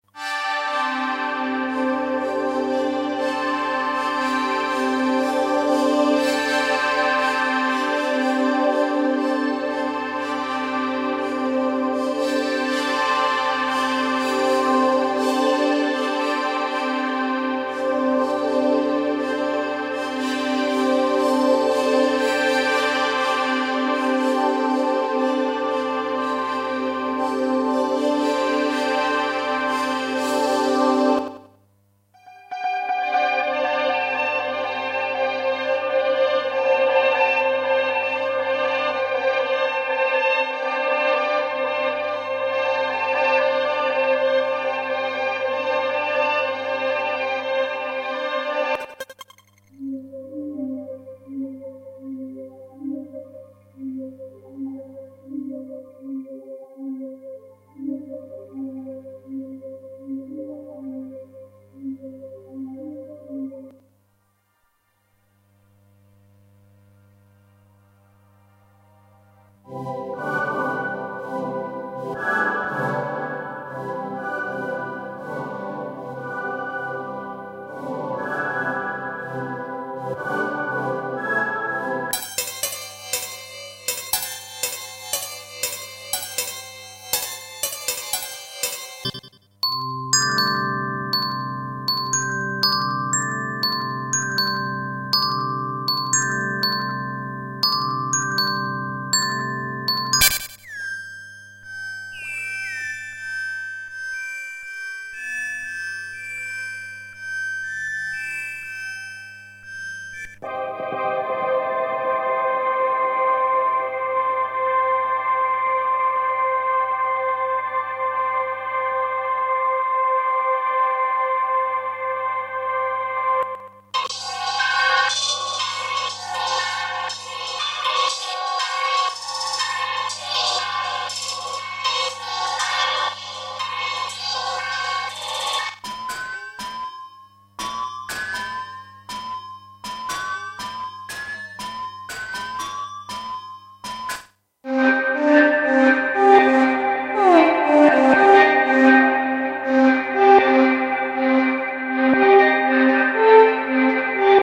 Too Many Dreams for One Nigth
ambient, Blofeld, soundscape, drone, pad, experimental, wave, waves, evolving, space, eerie